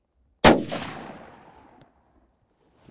Sauer 404 close shot 2
Sauer 404 rifle shot at very close proximity to camera at medium distance target
gun, shoot, bang, Sauer, shooting, hunting, 404, firearm, rifle, firing, shot, discharge